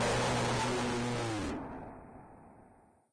Jumpscare sound 2
creepy, horror